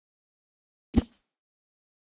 High Whoosh 03
whip, whoosh, woosh, swoosh